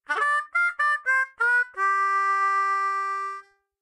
Harmonica Glassando Rift Key Of C 02
This is a recording from my practice session. It's a fast glassando followed by a little noodling. Played on an M. Hohner Special 20.
Glassando, Rift